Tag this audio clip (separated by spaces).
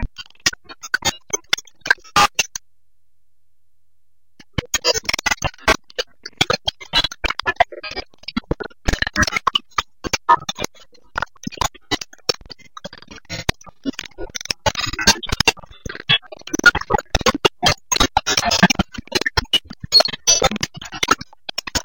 computer error glitch